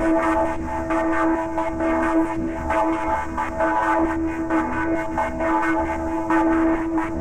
FEM1-Seq 2-2
thx for that!
the sequence is recorded in fruity studio and i addded several filter,a waveshaper,parametric eq,a stereoenhancer and compressor-limiter-noisegate.
have fun with this loop!
greetings!
filtered, sequence, synth